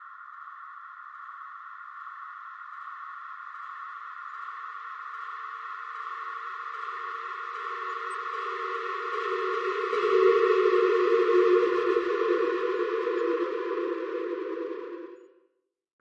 Sound of a space ship flying overhead.